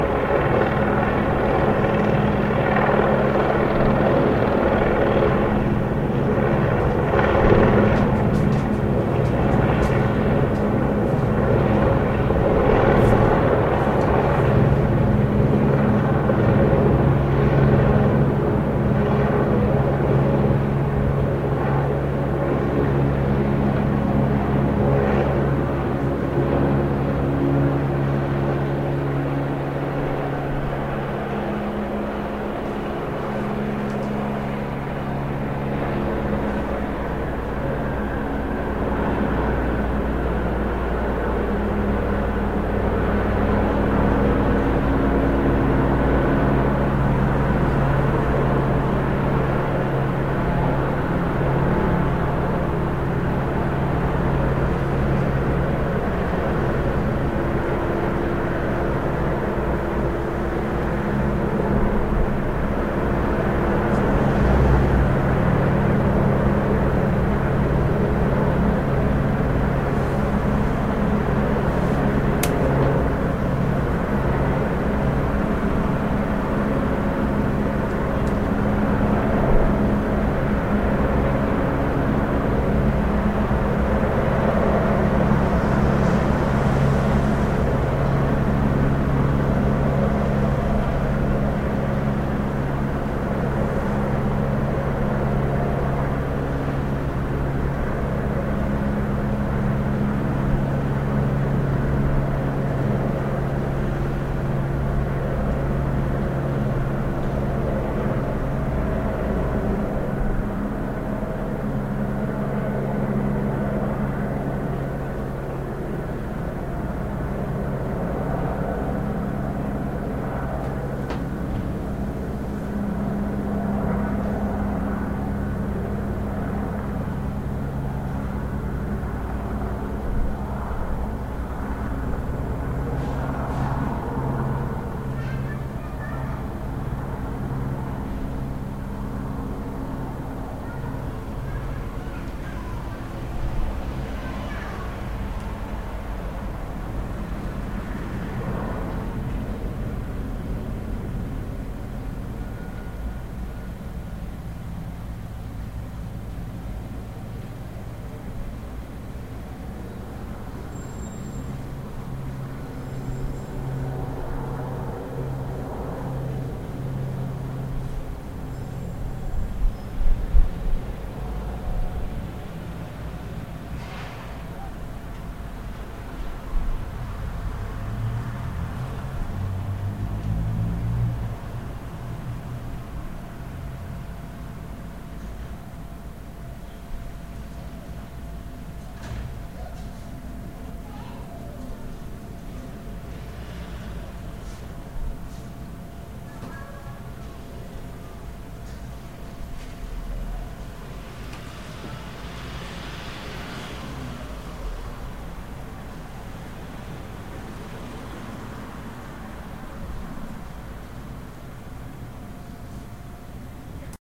Presumably a police-helicopeter hovering over Christiania recorded directly into my computer through my chinese condenser microphone.